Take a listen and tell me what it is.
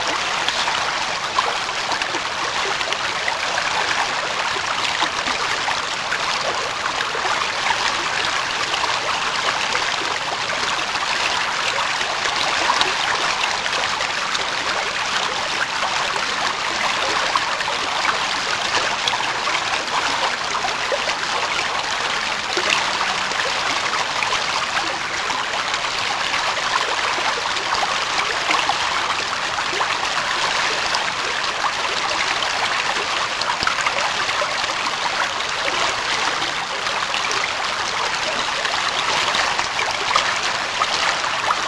creek crooked creek
Sound of a fast moving creek. Taken in the spring of 2010 at Crooked Creek Forest Preserve near Chicago, IL.